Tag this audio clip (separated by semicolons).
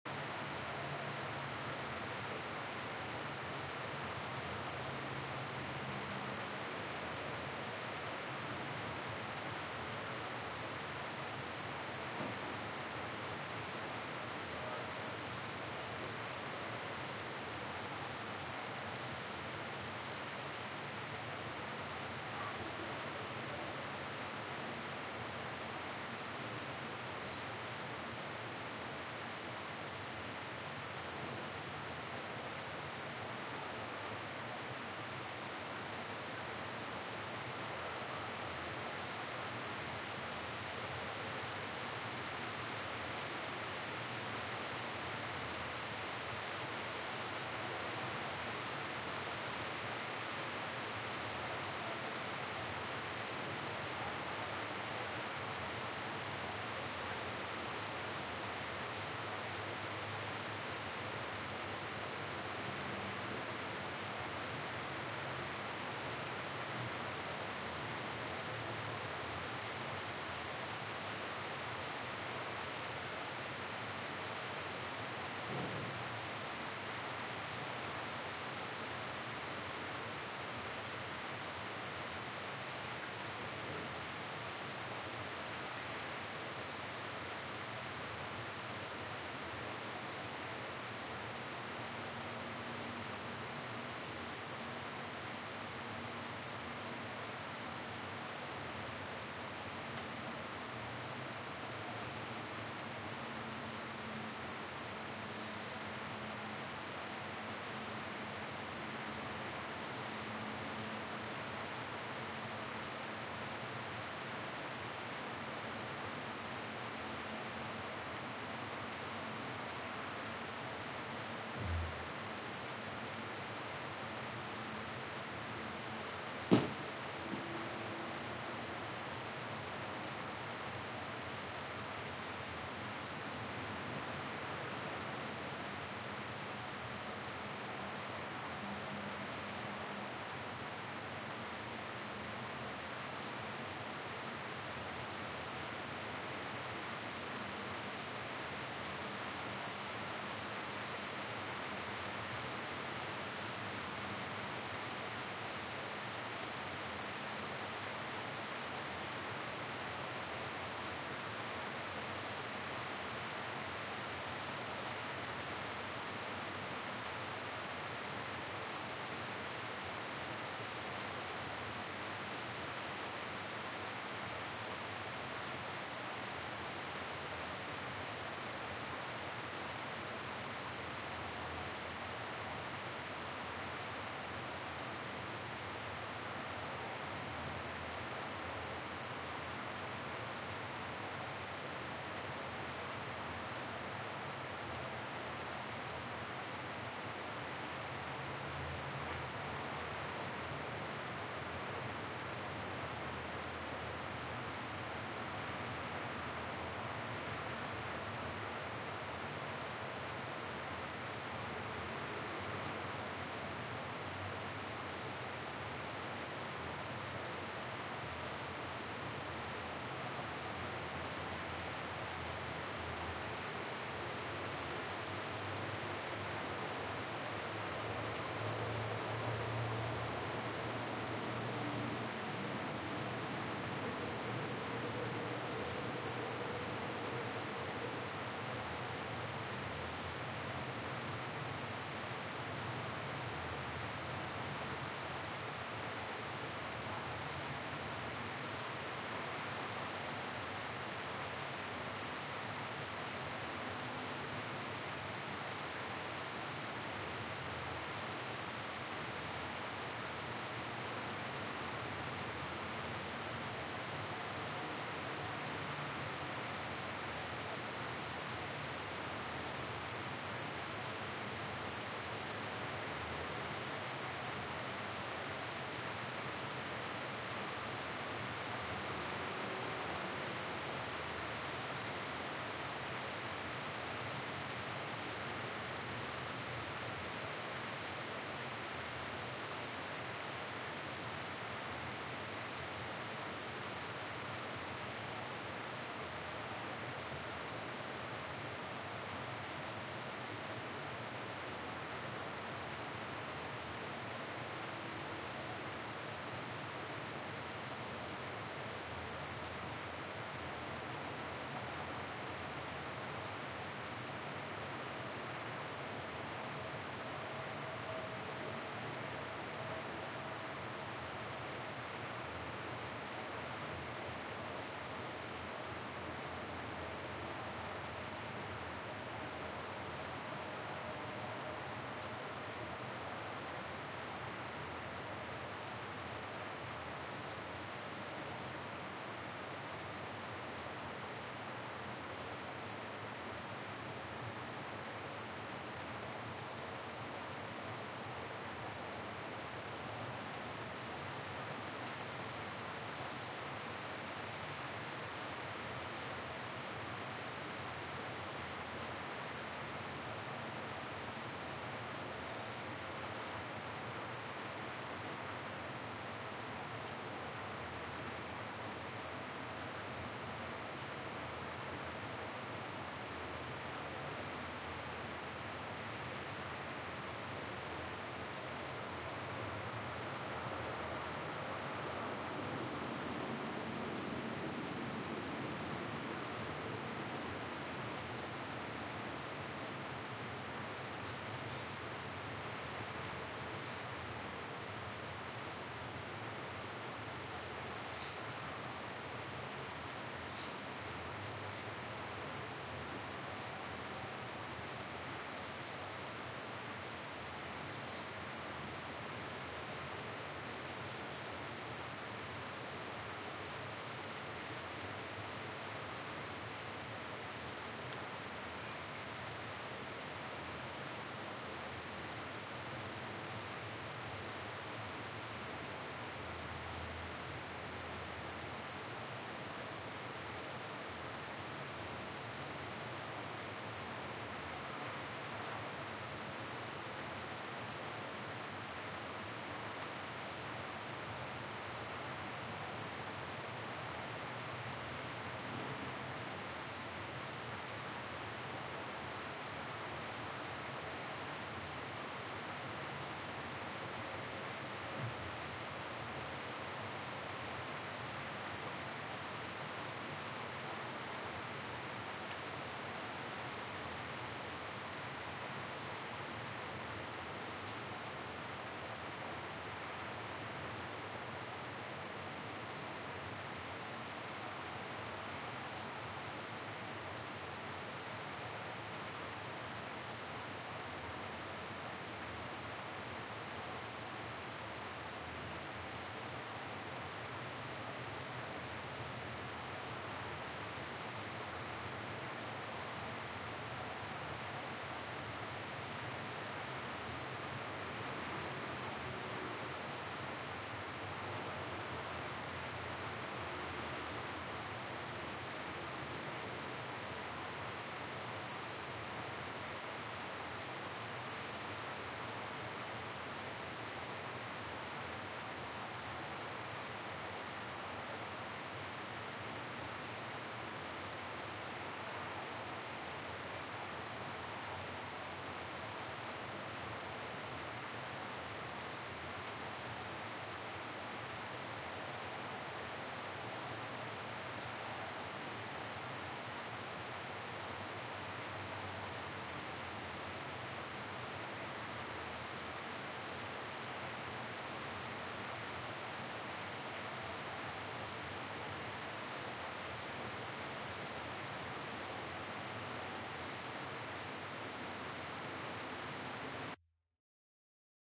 field-recording; nature; rain; summer; weather